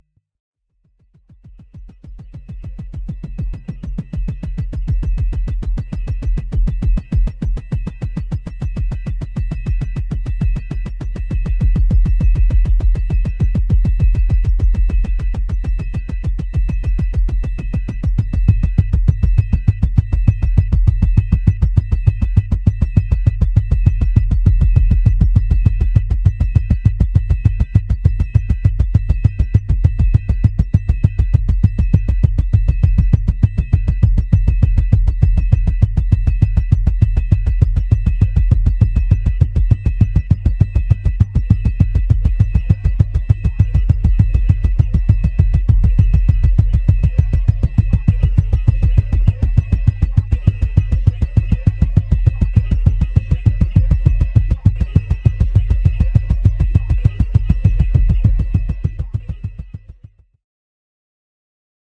Beat Night
Max Msp + Korg Ms20
If you use hit me up so I can hear how you did that!
percussion-loop, quantized, drum-loop, drumloop, rhythm, loop, groovy, beat, 130-bpm, container, percs, maxmsp, synth, rhythmic, pipe